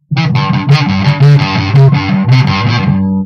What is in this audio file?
A cool metal riff I made recorded straight from my amp, using an electric bass guitar with pedal, enjoy!
Guitar, Loop, Metal, Riff